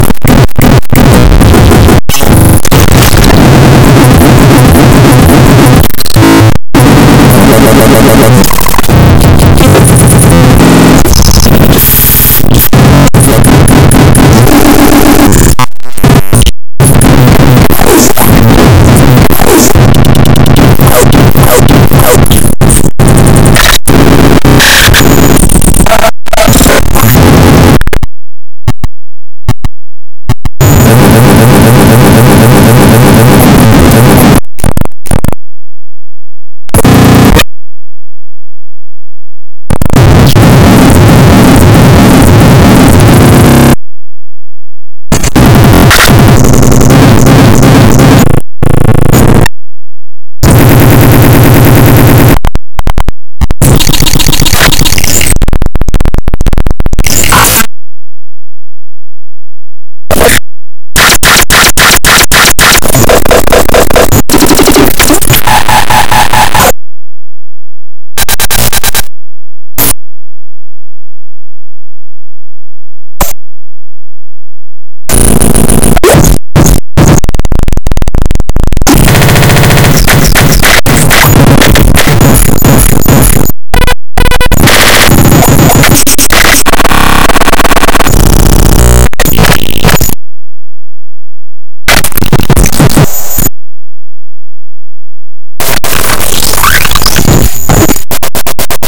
glitch farm # 004
something for glitch artists to take bits and pieces of
created by mangling a sample in Reaper's JS scripting language